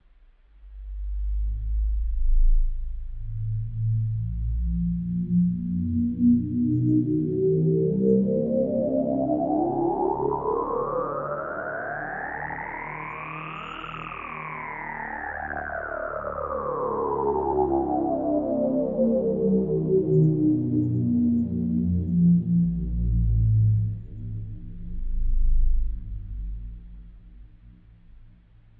ROLAND JX8P my own sound Patch